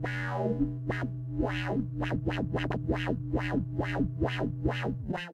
sound of my yamaha CS40M analogue